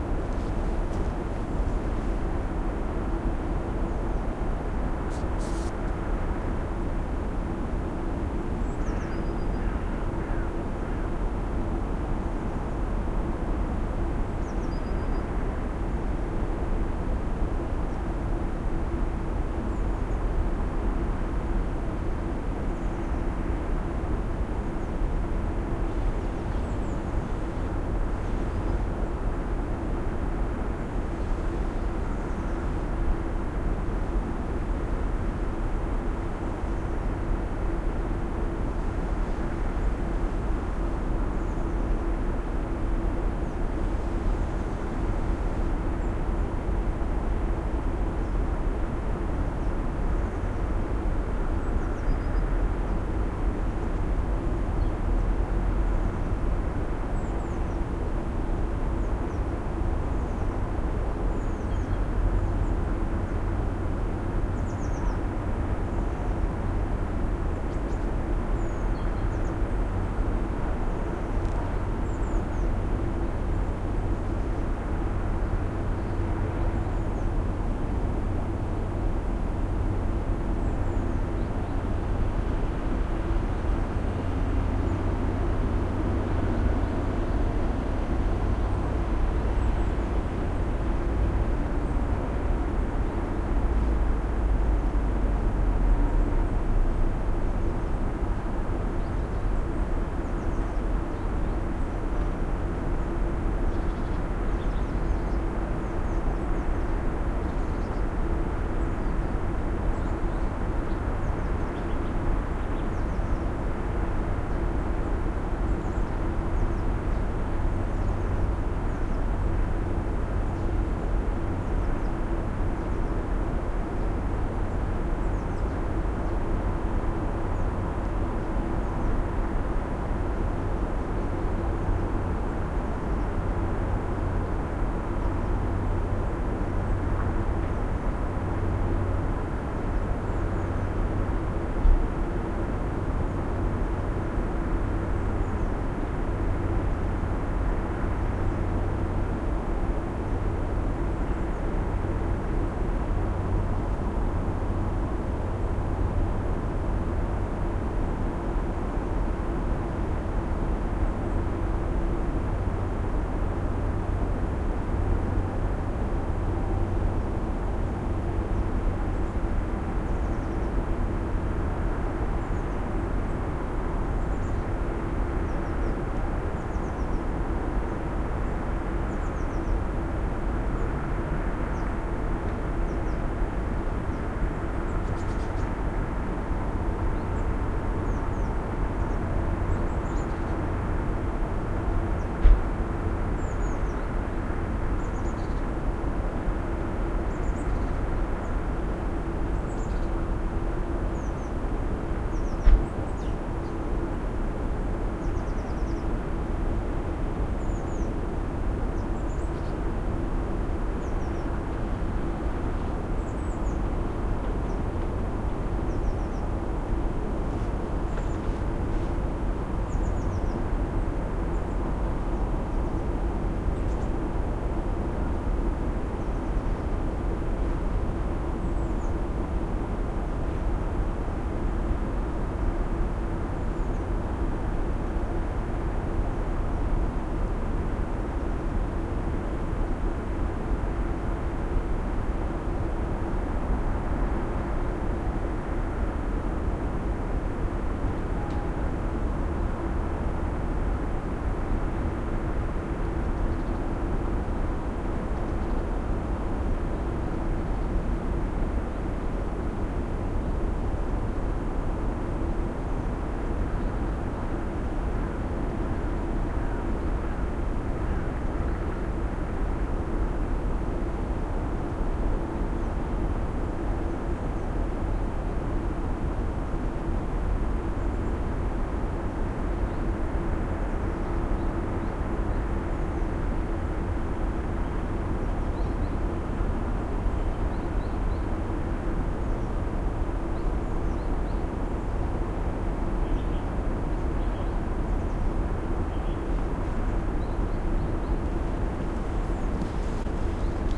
Ladehammeren Keynote
Stereo recording from Ladehammeren, Trondheim, Feb-13, abot 16.00. Two lav. microphones strapped to a tree with elastic bands, zoom h4n, with a mic gain of 60. The recording was made on a hill overlooking Trondheim, everything on a distance, nothing really standing out, except from passing birds.
Trondheim, Keynote, Traffic, Birds, Rush